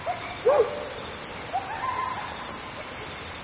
Forest Ambient - Owls